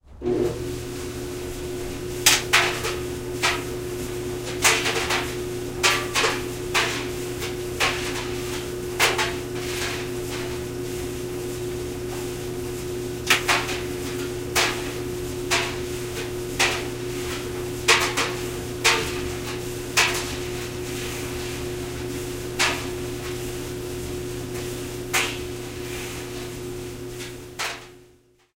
Clothes Dryer Shed
recording of a clothes dryer in a metal shed. Rode Nt-4, Sound devices Mixpre, sony Hi-Md. Transferred digitally to cubase for editing.